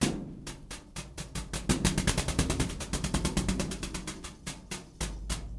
Thump and hits on hallow metal object